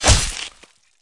Brutal Impalement
The sound of something being impaled by a heavy war pick(or whatever else you want to use it for). Produced with Ableton.
brutal-impalement, flesh-impalement, hit-sound, impaled-flesh, impalement, lethal-impalement, melee-attack, melee-attack-sound, melee-hit, melee-sound, pick-attack-hit, stab-sound, war-pick-attack, weapon-hit-sound, weapon-sound